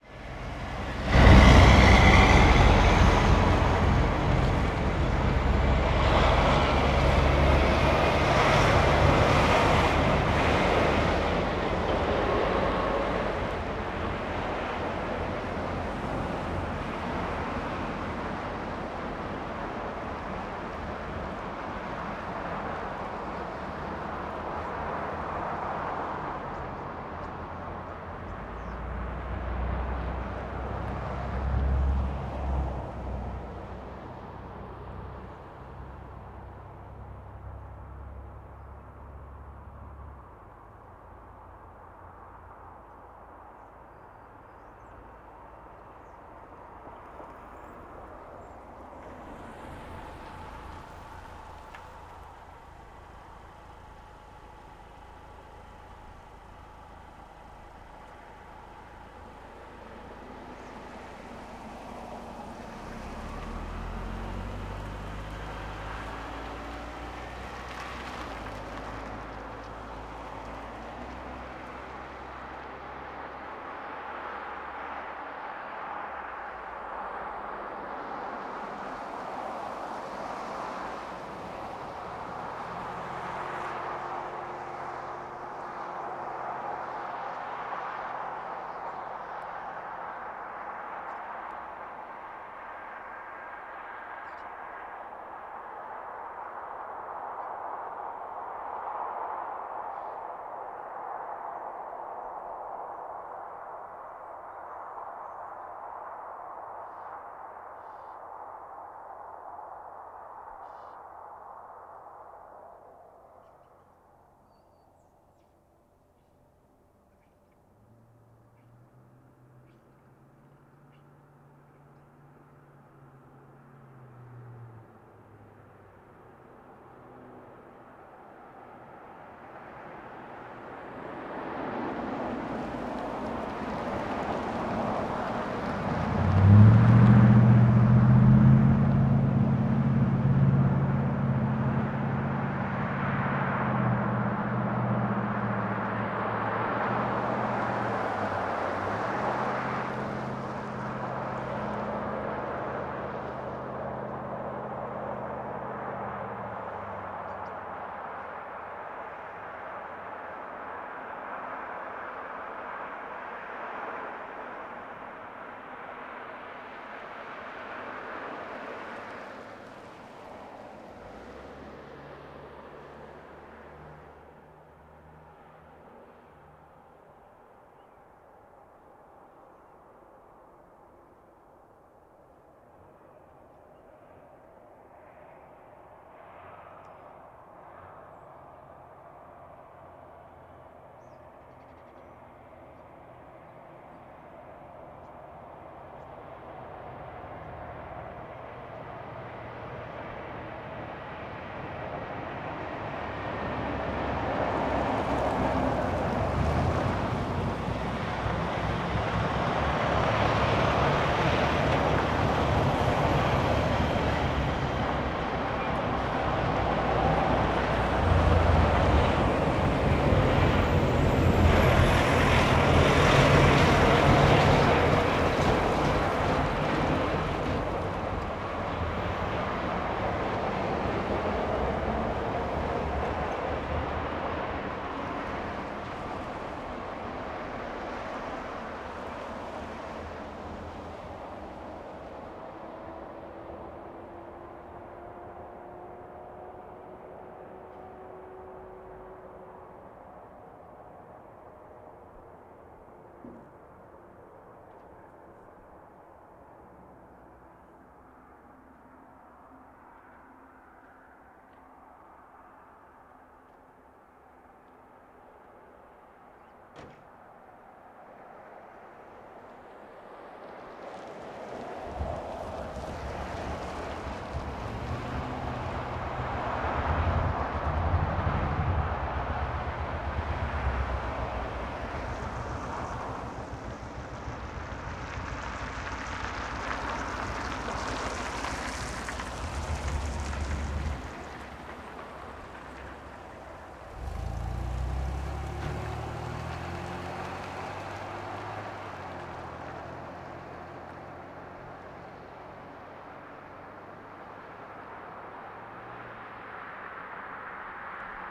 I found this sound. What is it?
Country Highway 001
This is a corner in a small country town with light traffic. Trucks and cars and pickups pass by.
Recorded with: Sound Devices 702T, Sanken CS-1e